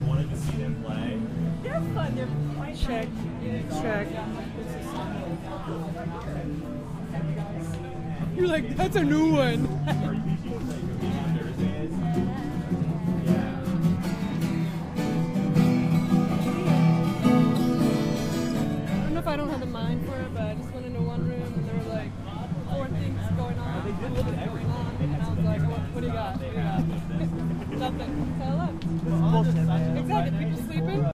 This is a part of a set of 17 recordings that document SoundWalk 2007, an Audio Art Installation in Long Beach, California. Part of the beauty of the SoundWalk was how the sounds from the pieces merged with the sounds of the city: chatter, traffic, etc. This section of the recording features pieces by: Long Beach citizens